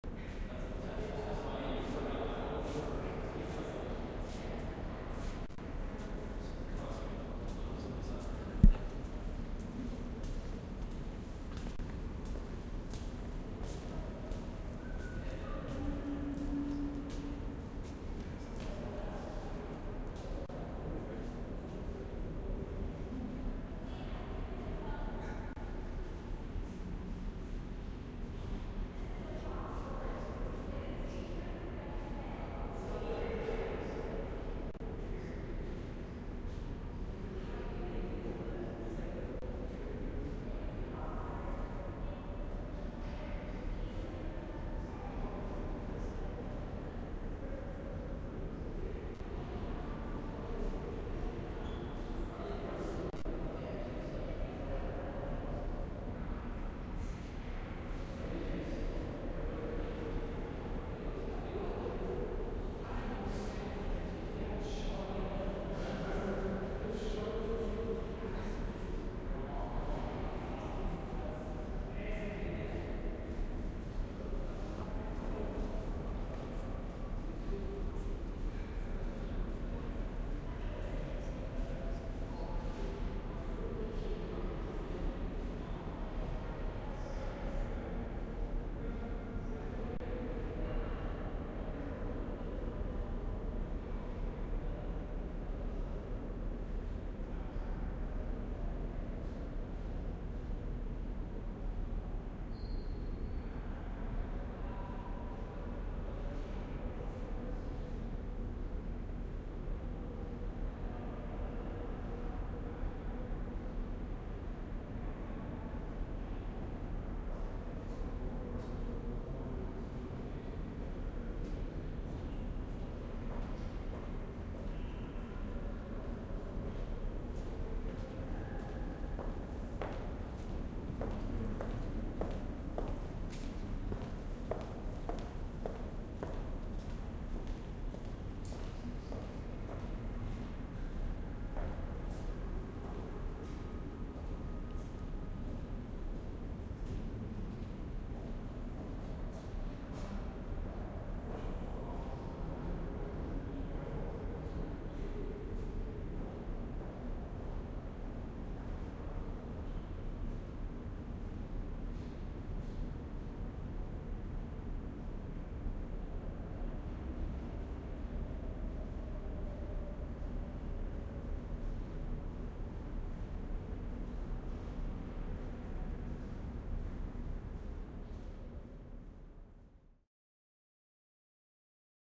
Filed recording of a museum. A large reverberant space, small crowd, distant voices.
field-recording, reflective, large-space, crowd